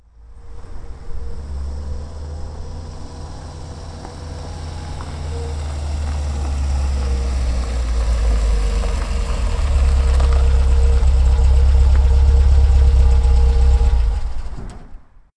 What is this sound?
An MG-B arriving, and the engine being turned off. Minimal editing in Audacity to fade out to minimise background noise.
Recorded with a Marantz PMD-661 with built-in microphones, for A Delicate Balance, Oxford Theatre Guild 2011.
engine, gravel, mg